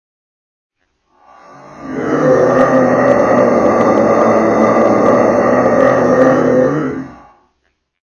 This is me and I used 4 tracks that I stretched to slow down with two tracks in reverse. Thanks. :^)